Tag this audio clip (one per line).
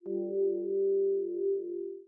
frequency
volca